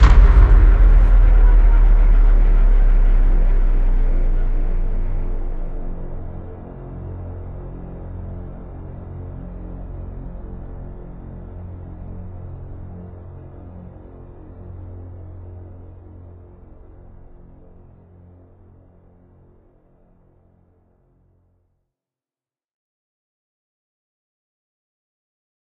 Wrap it up (Break point only)
Single hit cinematic with break climax only
atmospheric, suspense